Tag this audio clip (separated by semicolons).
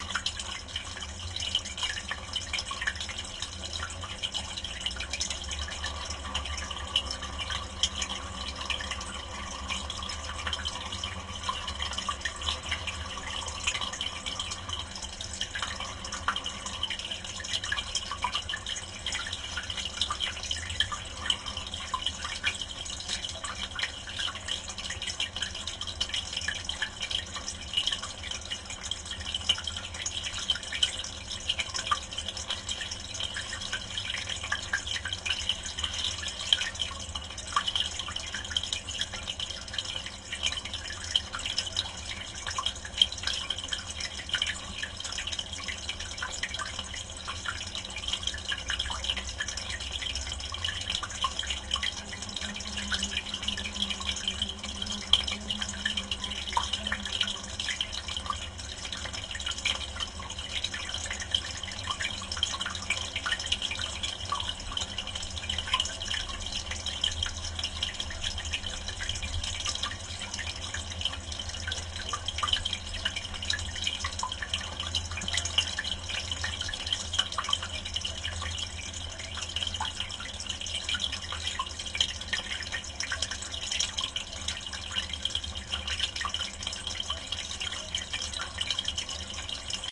pipe; water; water-spring; field-recording; water-tank; sound-effect; ambient; movie-sound